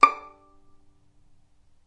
violin pizz vib D5
violin pizzicato vibrato
pizzicato, vibrato, violin